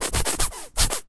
Recording of a hissing noise being made with the teeth and lips